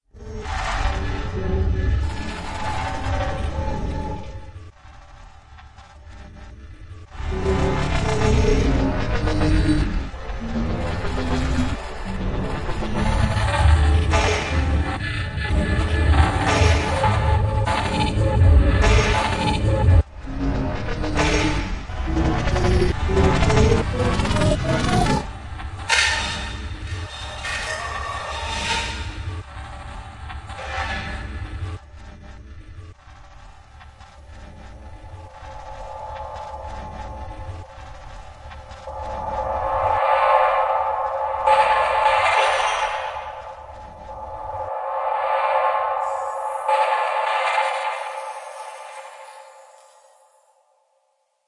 Part of the continuum (the never ending collaboration project).
This sample is a remix of:
Took snippets of this sample and pitched them up/down or re-pitched them (i.e., sample stretching). Applied various types of delay and reverb.
Applied EQ, to isolate mid or high frequencies in certain snippets.
Most of the metallic like sounds were the result of sample stretching and or spectral processing to warp the frequency contents of the sounds (i.e., invert the frequency contents of the sample: high frequencies become low frequencies and vice-versa).
The resulting sound clip is about 50s long and makes me think of an evil machine that has devoured all the resources in a planet to produce an army of horrendous and ferocious cyborgs.